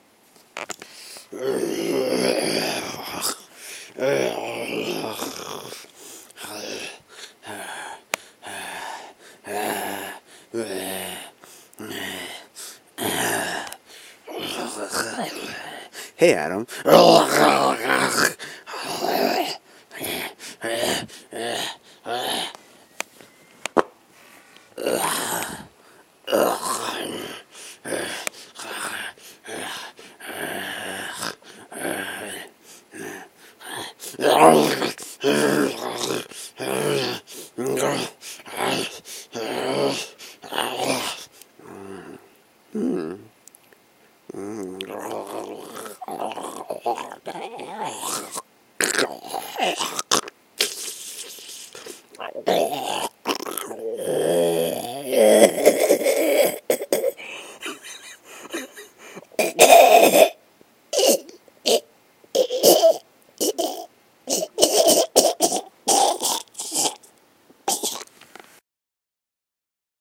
"Temp" zombie noises that made it into the film regardless. "Hey, Adam!"
dead-season, horror, monster, snarl, solo, undead, voice